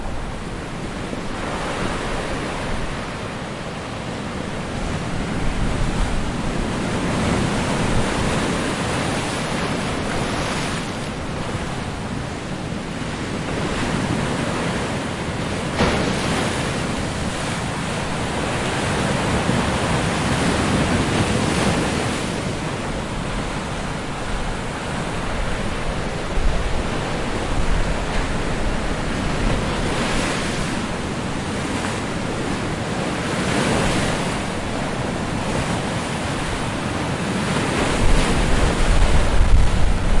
Rough sea on the Atlantic coast,two days after heavy winds, waves splashing on to rocks.